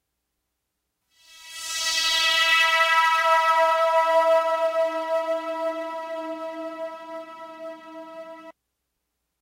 Just some cool short synth pads free for your mashing